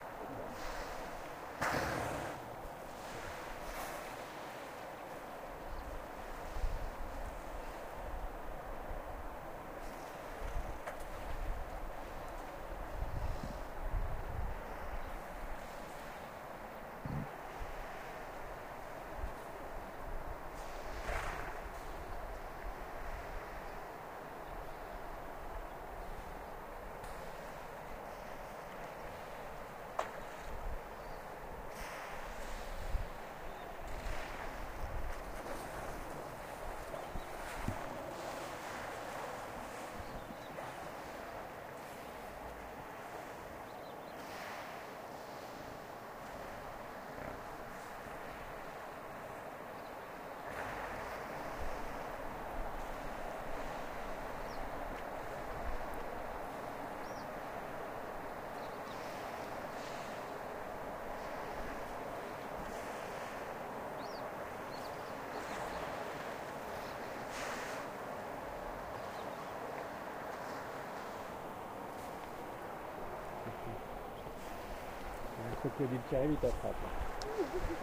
hippopotamus in Grumeti river